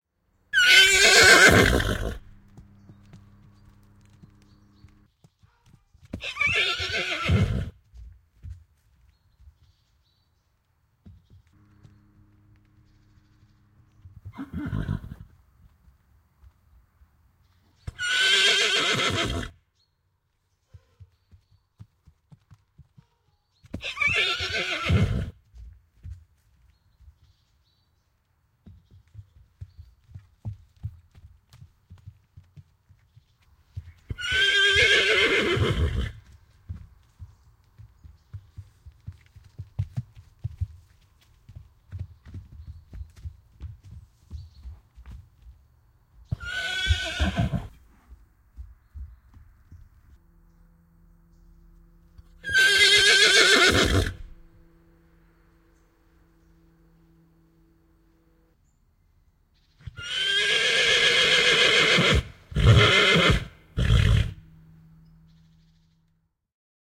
Hevonen hirnuu ja liikuskelee laitumella.
Paikka/Place: Suomi / Finland / Vihti, Tevalampi
Aika/Date: 08.08.1995